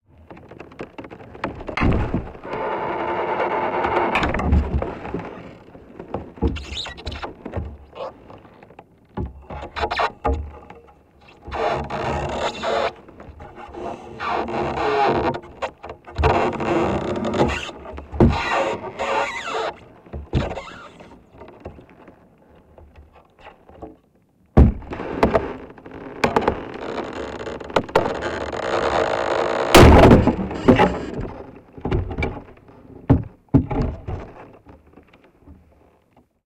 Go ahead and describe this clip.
A hydrophone field-recording of sections of ice being levered against the main body of ice in a pond.DIY Panasonic WM-61A hydrophones > FEL battery pre-amp > Zoom H2 line-in.
trosol, crack, thump, creak, field-recording, hydrophones, water, squeal, lever, groan, ice, spaced-pair